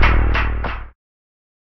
Viral Abstracted BD 02
Abstract, Noise, Industrial
bass, drum